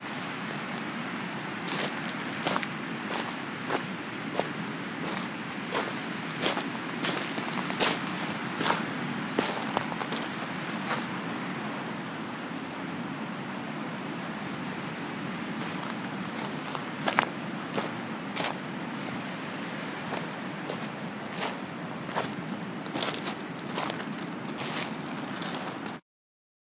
Walking On The Beach 01
Ambience, Atmosphere, Beach, Field-Recording, Footsteps, Ocean, Pebbles, Rocks, Sea, Wales, Water, Waves